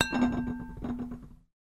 32 vase ping wobble
taken from a random sampled tour of my kitchen with a microphone.